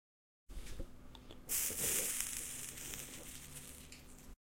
A Sound effect of a cigarette that is being put out in a tiny amount of water for that sizzling effect.